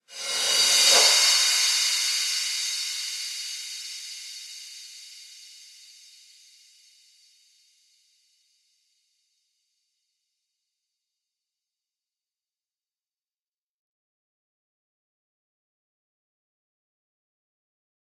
Rev Cymb 14
Reverse Cymbals
Digital Zero